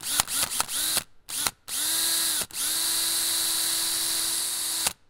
DeWalt Drill 1
Sample of a DeWalt handheld power drill.